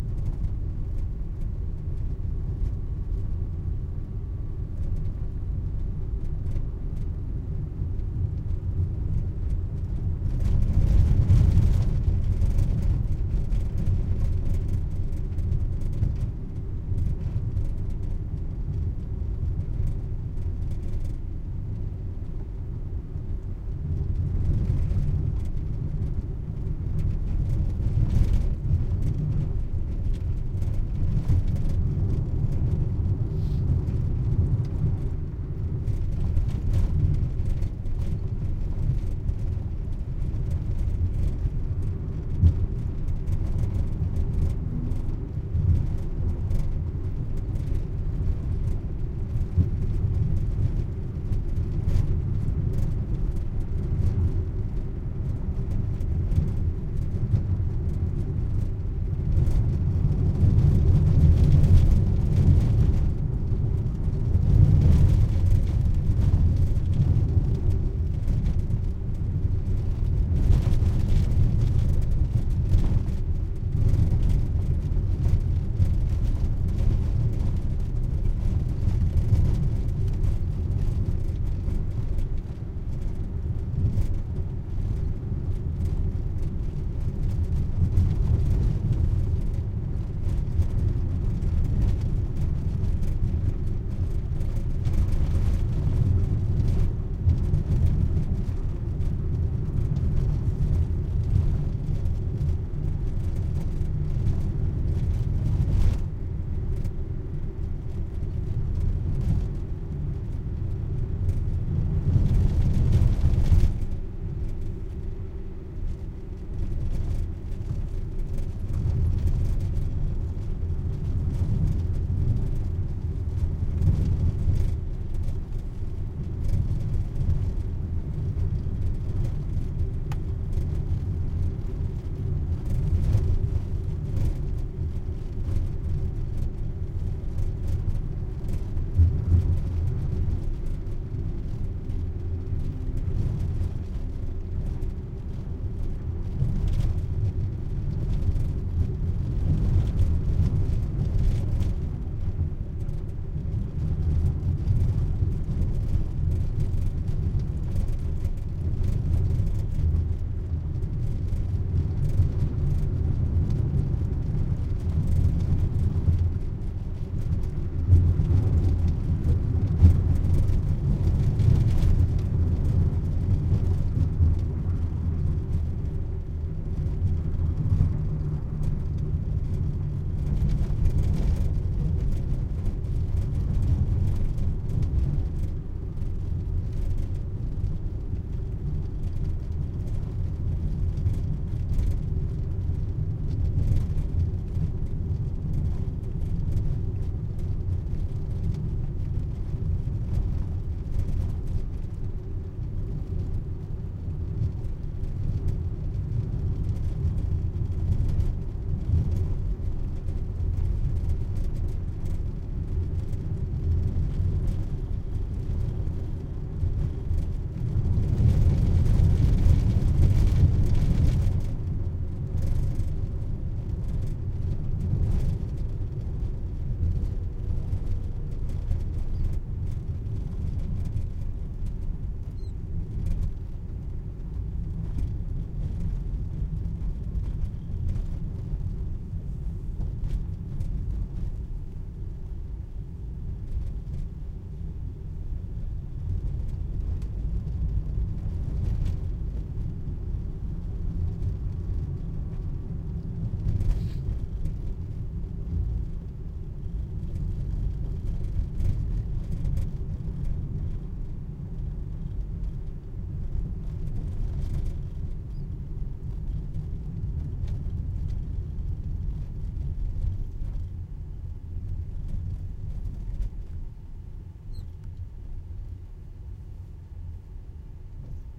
auto rattly bumpy road 30k R
cuban cab on long stretch of road, few if any passbys. recorded on an H2 in 4-channel mode. goes with "auto rattly bumpy road 30k F"
rattly, rear, auto, road, 30kph, bumpy, car